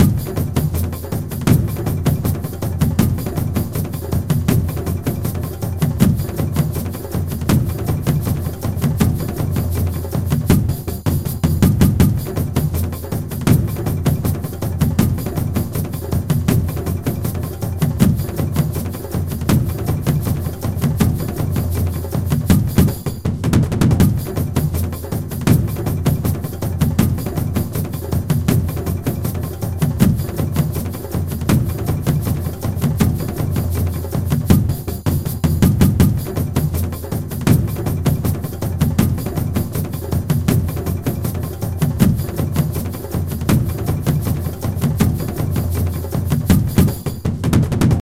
16 different drum sounds together as one. Recorded in FL Studio 9 with SampleTank XL and the World Groove's expansion. Each sound consists of sliced sounds, and a MIDI file to play the slices. You can also play the entire MIDI file to trigger the slices. The sound can then be synced to the host tempo. Sounds complex, but it works.